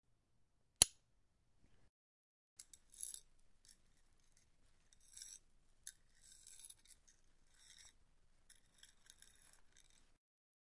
A close recording of my favorite bracelet. The only downside to wearing this bracelet often is that it has a magnetic closure, which attaches itself to necklaces if I'm wearing them. I only wish I had a recorder sensitive enough the get the echo around my room of the bracelet closing :(. Recorded with a ZoomH2 for Dare12.